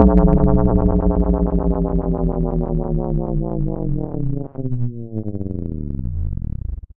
A sound made with the Goldwave Expression Evaluator to resemble a failing power generator.